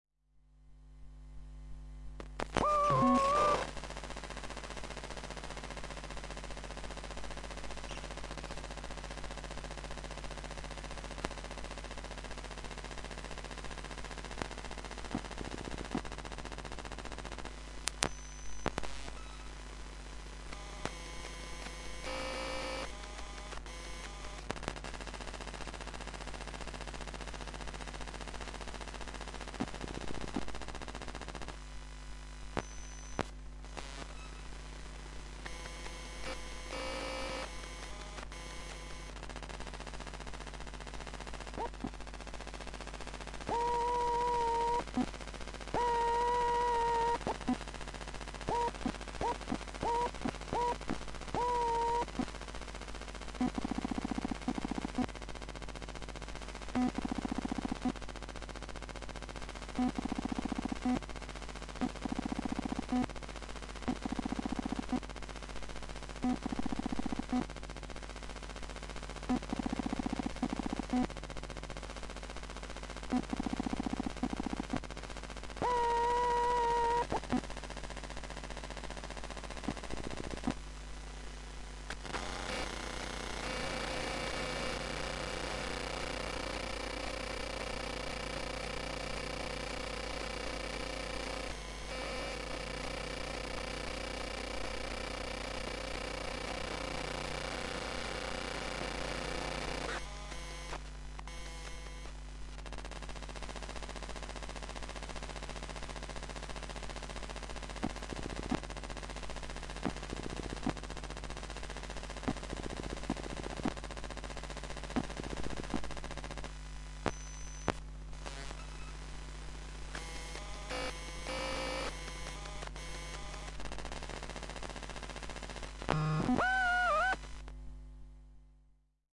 Compact Camera
sound-enigma, sound-trip, electronic, experimental